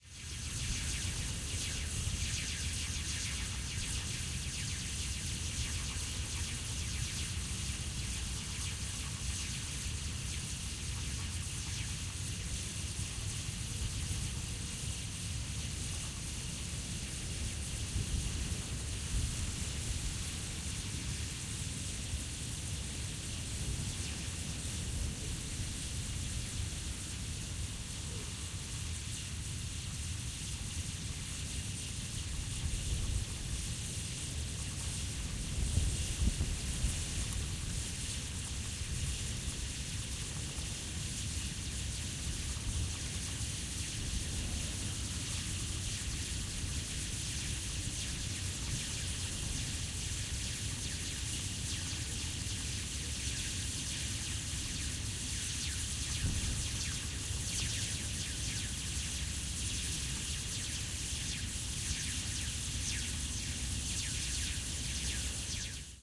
Ambi - Electrical lines ticling in wind - binaural stereo recording DPA4060 NAGRA SD - 2012 12 02 Mamau, Auberlin
This is a binaural audio recording, so for most true to nature audio experience please use headphones.